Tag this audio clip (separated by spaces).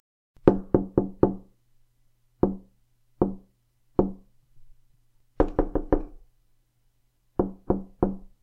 window knock tap